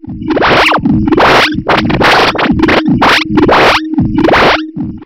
Create a new audio track.
Generate > 3 Tones with parameters :
Sawtooth, 493Hz, amplitude : 1, 1s
Sawtooth, 440Hz, amplitude : 1, 1s
Sawtooth, 293Hz, amplitude : 1, 1s
The length of the audio track is now 3secondes.
Apply a Phaser effect with parameters :
Stages : 2, LFO (Hz):0.4, LFO (Deg):0,Depth:100,Feedback:0%
From 0.00s to 0.50s of the track, apply Fade In effect.
From 2.50s to the end of the track, apply Fade Out effect.
Use "Change Tempo" on the track : set Length -> To : 5s
From 1.50s 3.50s of the track, apply a Phaser effect with parameters :
Stages : 24, LFO (Hz):0.1, LFO (Deg):50,Depth:180,Feedback:80%
Normalize.
Sawtooth, Audacity, Effect, SwapGun, Phaser